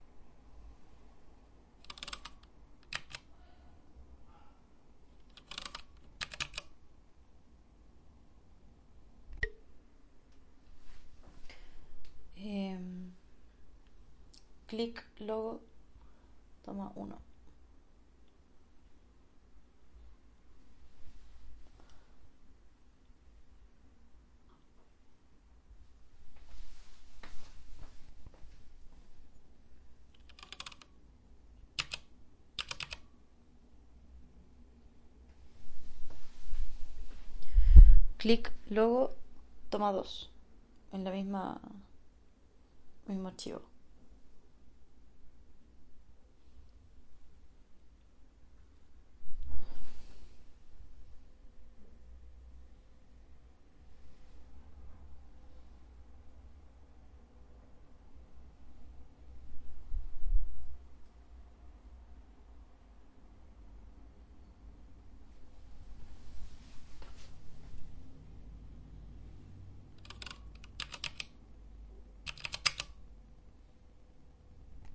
Mechanical keyboard clicking. Different keys (2)
Keycap Clicks 1 cherry mx clear switch
Mechanical keyboard clicking. Different keys
Cherry mx clear mechanical switches
The Cherry MX Clear switch is a medium stiff, tactile, non-clicky mechanical keyboard switch in the Cherry MX family.
The slider is not actually clear but colourless (in effect, translucent white). The word Clear is Cherry's own designation to distinguish it from the older Cherry MX White which is a clicky switch. Older types of the "white" also have translucent white sliders and are therefore visually indistinguishable from clears.
The Cherry MX Tactile Grey switch is used for space bars in keyboards with Cherry MX Clear switches. It has a similarly-shaped stem but a stiffer spring.
MX Clear is reported to have first appeared in 1989; however, it was included in a March 1988 numbering system datasheet for MX switches so it is assumed to have been in production in 1988 or earlier.
mechanical, clicking, keycaps, mx, keyboard, cherry, clear, switches, key, clicks